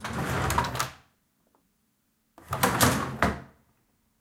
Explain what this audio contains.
Wooden Drawer open close 2 (of 2)
Wooden desk drawer opening and closing. (2 of 2)
Recorded with a Tascam DR-05 Linear PCM recorder.